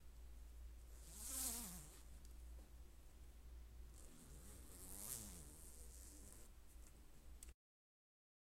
A unzipping and zipping sound of a hoodie